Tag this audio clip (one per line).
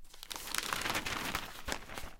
turn
page